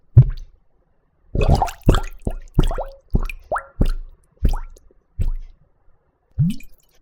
The sound of me placing a large ceramic bowl into a sink full of water in various ways. Be aware some of these are really loud, so be careful! Recorded with an AT4021 mic into a modified Marantz 661.
gargle, liquid, sink, water